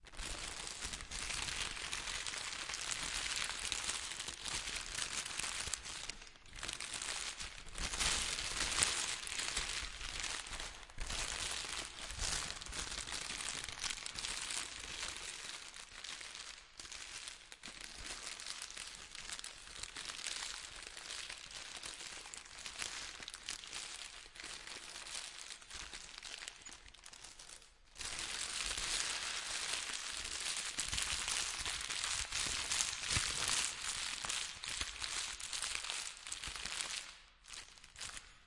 branches crunching3
voice, dark, Mystery, action